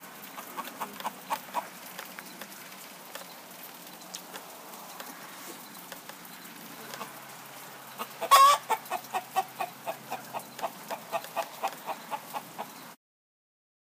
Black Jersey Giant rooster clucking. Recorded on iPhone 4s, processed in Reaper.
squawk, rooster, cluck